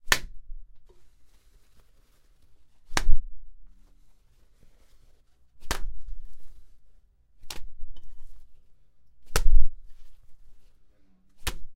A strong jump into a wet floor.